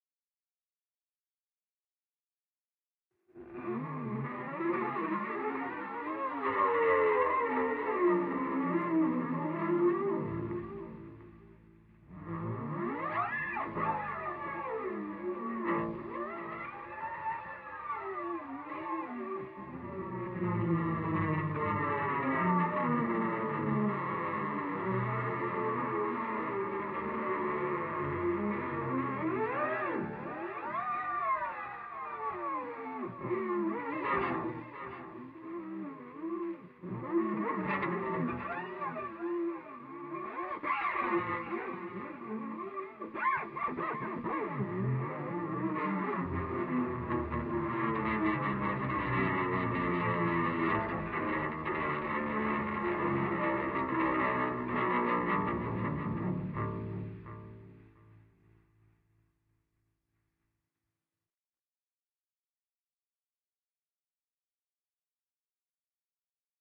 making love to my guitar
nails and strings...